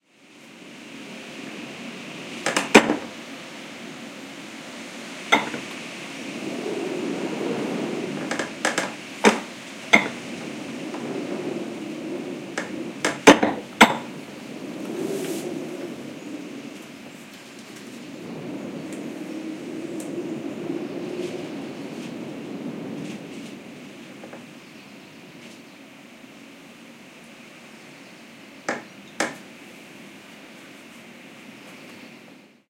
20160416 wind.gust.door.01
Gusty wind knocks at door, Audiotechnica BP4025, Shure FP24 preamp, PCM-M10 recorder. Recorded near La Macera (Valencia de Alcantara, Caceres, Spain)
knock, howling, wind, ambiance, dreary, storm, gusty, field-recording, dark, bang, sinister, nature